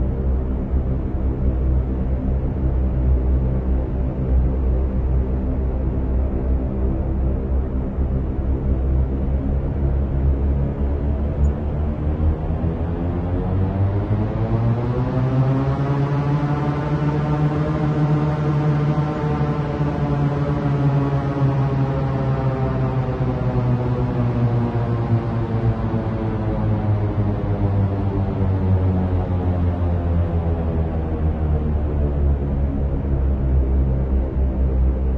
Aircraft Dive
aircraft, airplane, dive, plane, prop, propeller, request